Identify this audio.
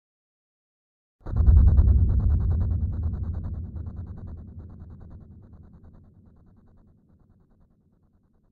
Dramatic Bass Hit
A very dramatic, bass heavy drum hit with an echo. Created by pitching down and layering a few drum samples.
bass, dramatic, echo, hit